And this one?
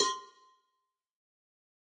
Cowbell of God Tube Lower 027
home, metalic, trash